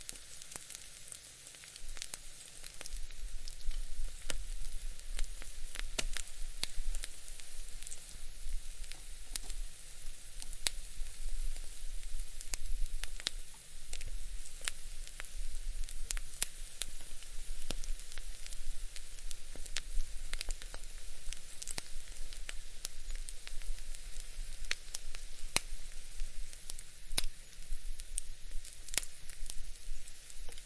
This is an egg cooking on my stove top.